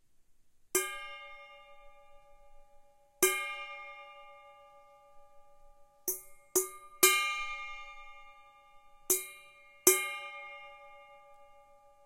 the sound of me hitting the outside of an aluminium kitchen bowl with a metal fork. recorded with a SONY linear PCM recorder in a professional recording studio. recorder was placed on ground several inches away from bowl. bowl was held in air by my hand.